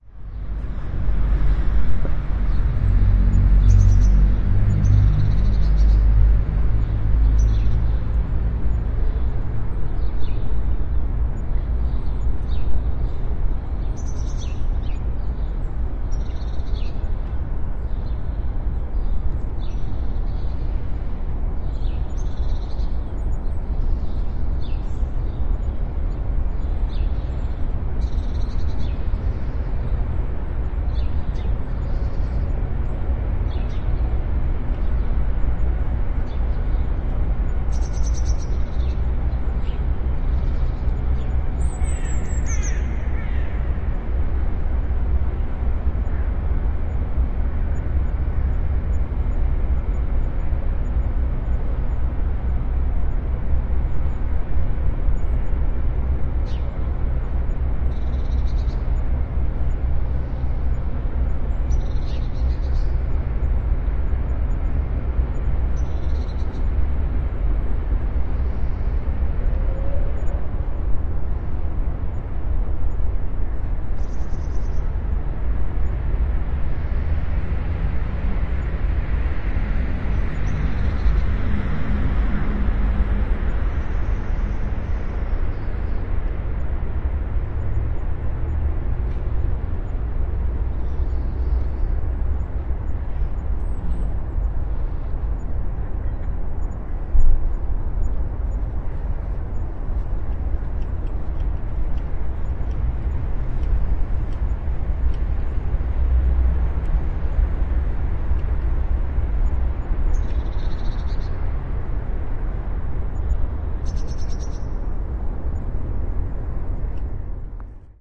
Stadt - Winter, Morgen, Vögel
Urban ambience recorded in winter, in the morning hours with birds in Berlin
ambience, Berlin, birds, field-recording, morning, winter